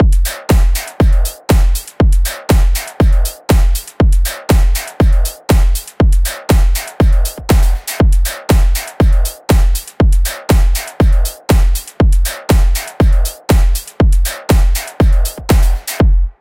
Tight Metallic Drum Loop
Sharp and tightly timed and EQ'd dance drum loop with metallic percussion.